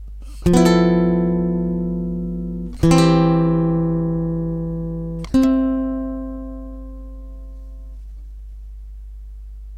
short ending fragment played on a guitar with nylon strings